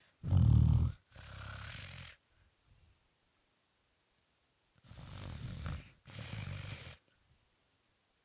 este es el sonido de una goma impactando con el suelo, grabado con un movil de alta gama.